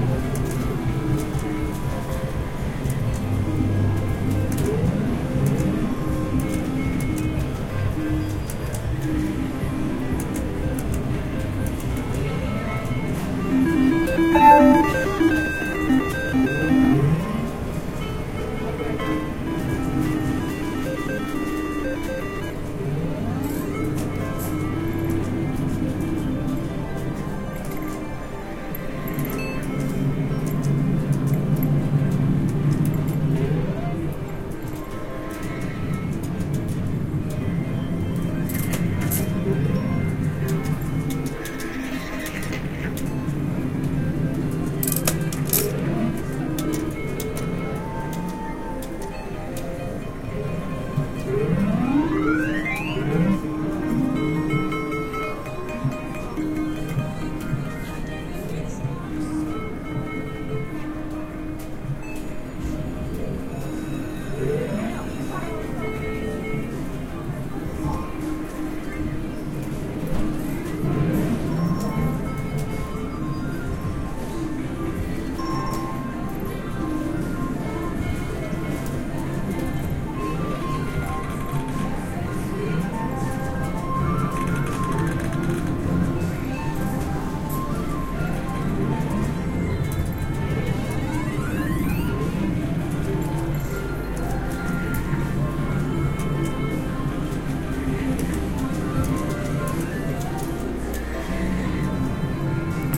Casino - Las Vegas Slot Machines
The Casino sounds of Penny Slots, Video Poker and Keno machines inside a Las Vegas Casino.